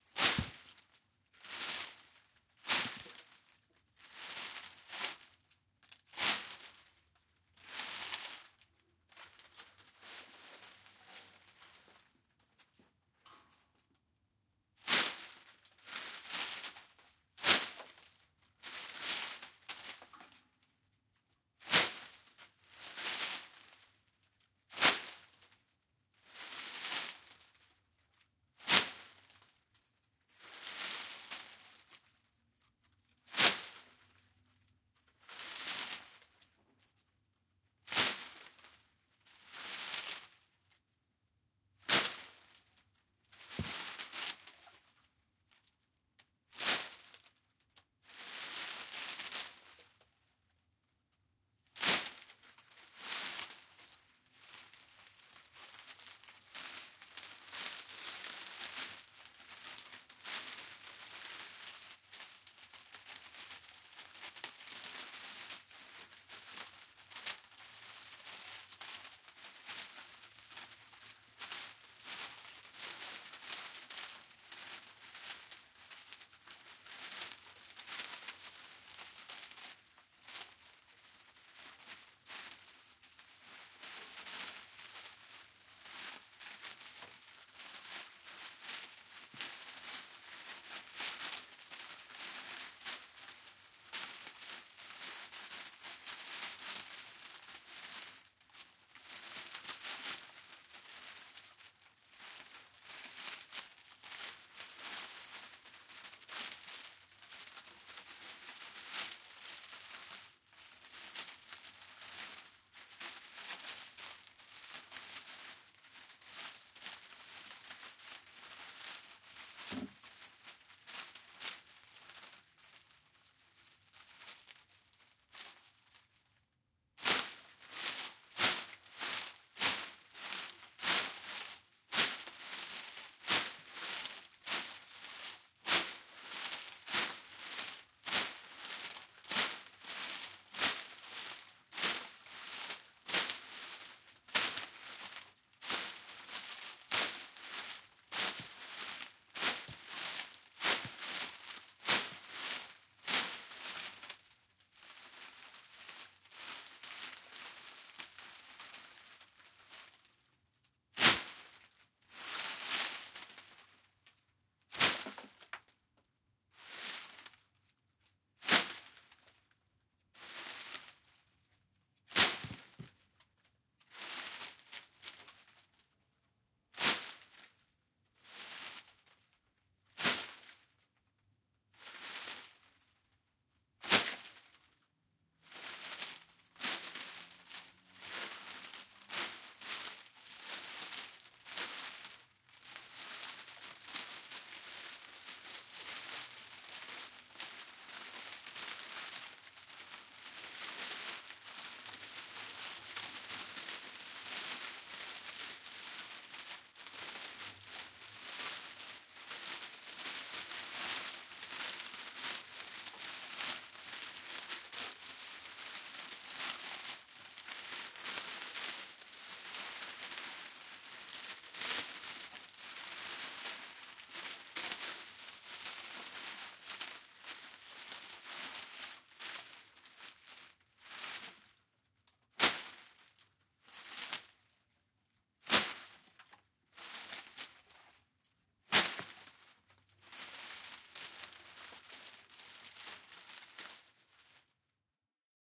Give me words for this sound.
Branch Impact 2
No, we came here to share freely, so let's share FREELY! When we all rise, we ALL rise.
If you REALLY appreciate the work that went into this (cutting tree branches, getting them into my basement, setting up the mic, recording, post-production processing, cleaning up, uploading..)
If you like TTRPG roleplaying combined with cutting-edge sound design, you'll like Sonic Realms. There's nothing else like it!
Thanks! I hope this is useful to you.
forest, grass, impact, leaves, wood